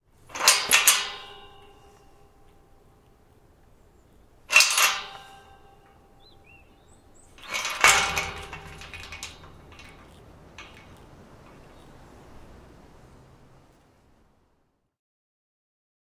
Outdoors Gate Chain-Clang-Lock-Rattle
A selection of nature and outdoor sounds.
clang, farm, fence, field, field-recording, foley, gate, lock, metal, outdoors, rattle, shake